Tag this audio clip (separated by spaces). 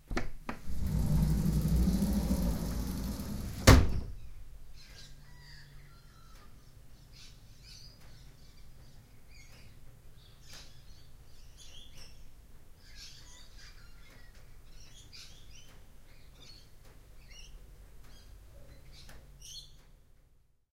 opening sliding birds open-window binaural suburbia field-recording